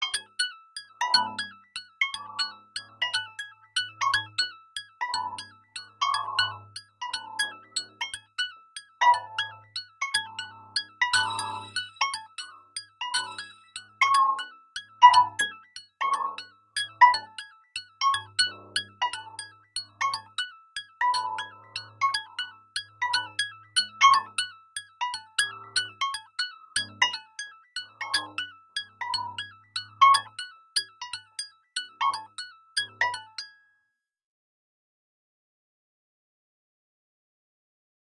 kaivo bleepseek high
high-pitched blippy synth sequence pitched melodic
sequence, loop, seq, blippy, synthline